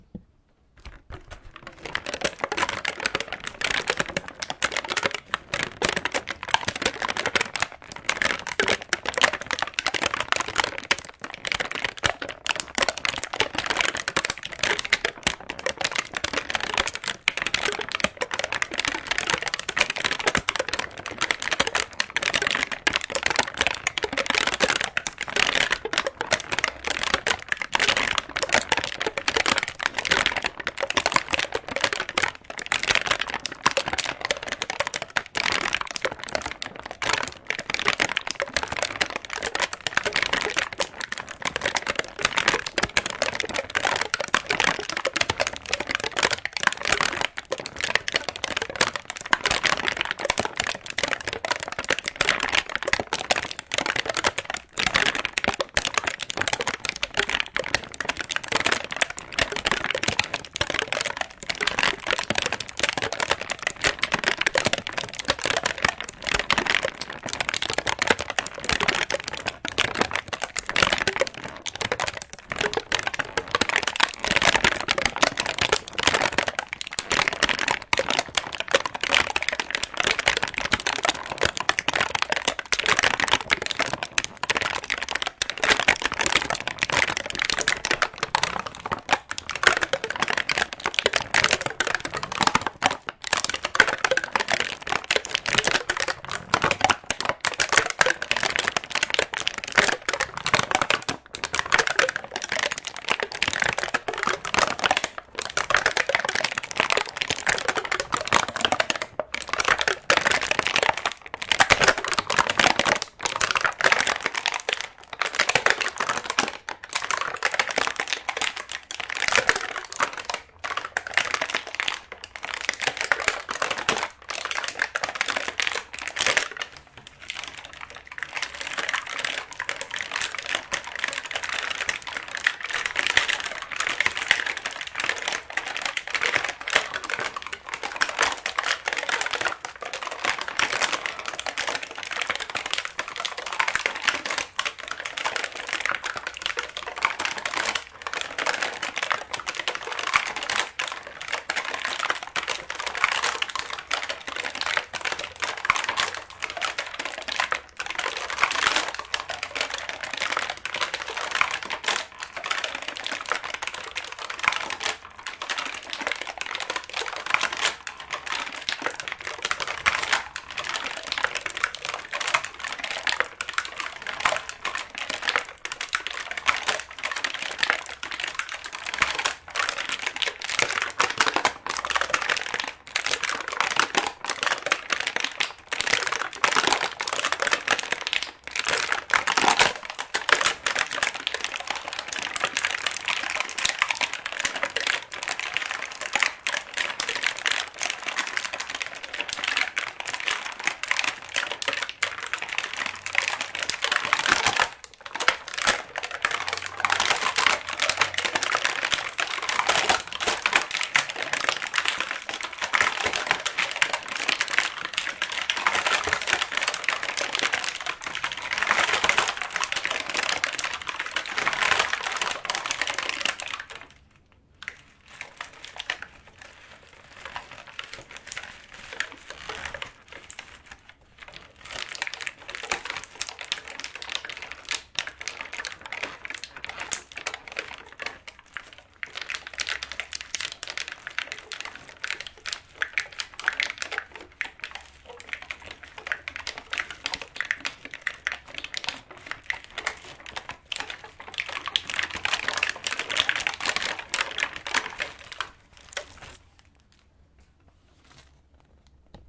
Simulated sound of fire, trying to be the type of fire that consumes large wooden beams; It is made from the manipulation of a plastic package and a soda bootle.
Recorded with Zoom H4-N
Sonido simulado de fuego, intentaba ser el tipo de fuego que consume grandes vigas de madera, está hecho a partir de plásticos duros (empaque de galletas y una botella de soda.
Grabado con un Zoom H4-N
fire, foley, plastic, Zoomh4n